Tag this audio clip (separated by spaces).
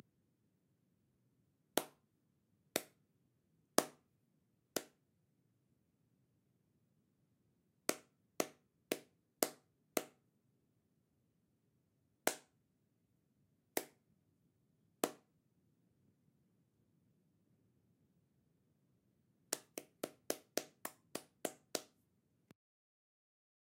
clapping hand